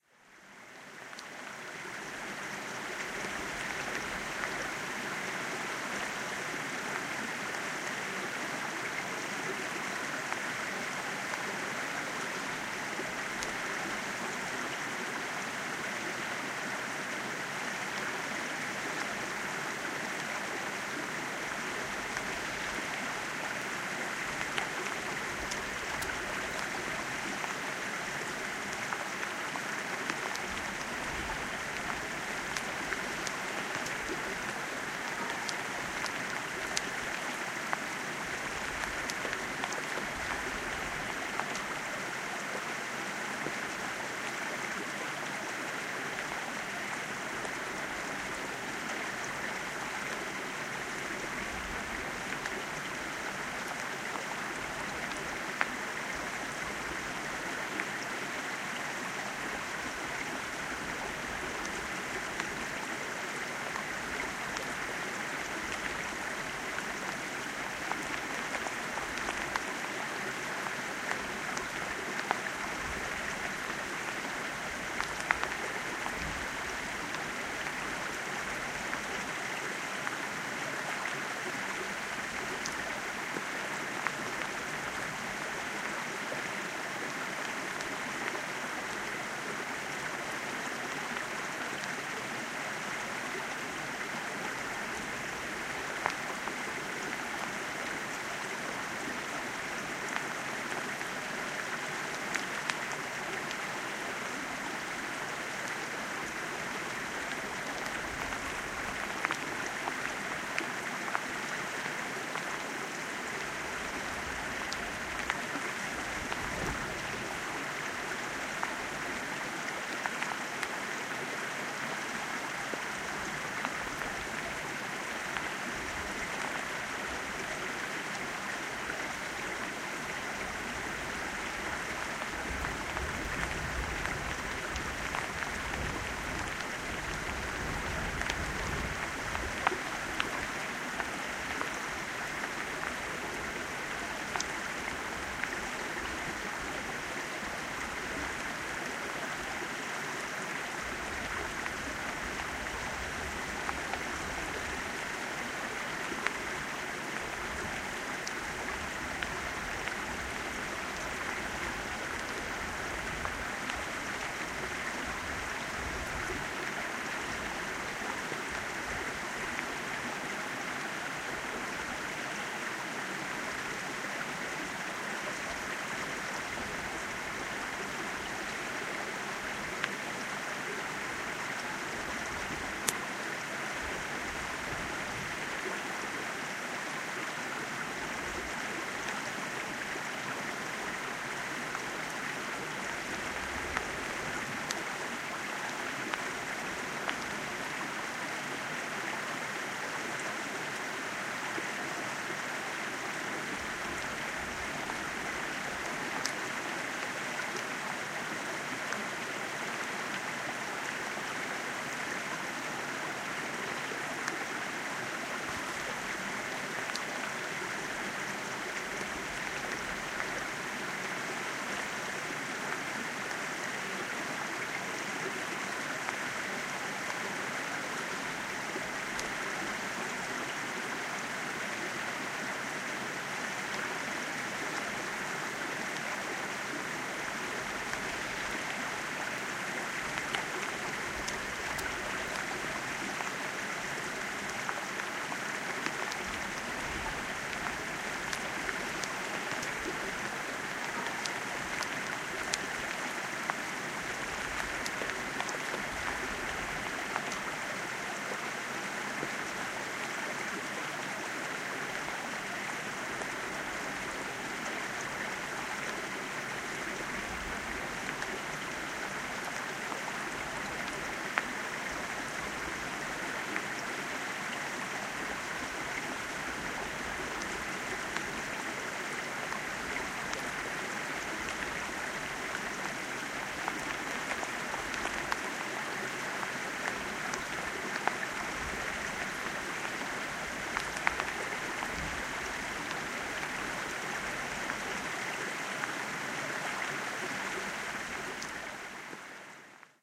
Swollen stream flowing into a calm sea in a rain. Recorded after the nemo storm went pass. Recorded using Marantz PMD660 recorder.